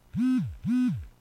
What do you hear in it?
Double vibrate notification from a mobile phone.
mobile-phone, vibrate